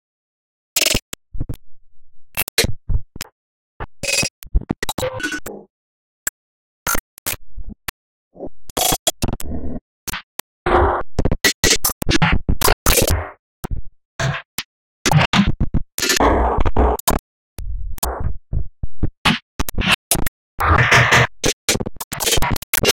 John, don't move the audiokitchenware... it is driving me crazy!
My machine seems to shove around things in the kitchendrawer